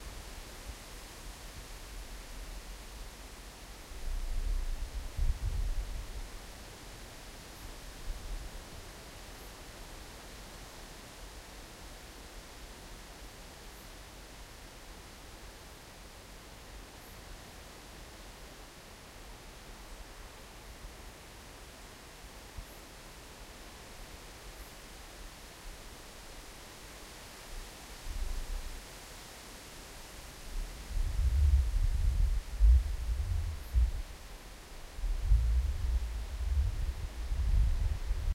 breeze tree
wind tree leaves garden contryside